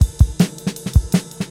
Fast break beat on an acoustic kit, 160 bpm.
Sean Smith, Dominic Smith, Joe Dudley, Kaleigh Miles, Alex Hughes + Alistair Beecham.
Acoustic, 160-bpm, Drum-n-Bass